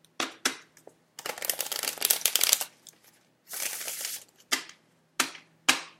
Card Shuffle Win88
No special mic was used.
cards, casino, gambling, sfx, shuffle